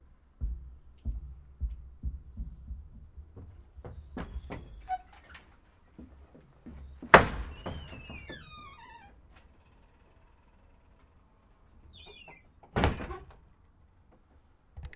Running Onto Porch Slamming Screen Door
Running up stairs of a wooden porch, then slamming a screen door. Extra slam at the end in case you need a quieter one.
door, floor, metal, porch, run, running, screen, slam, slamming, wood, wooden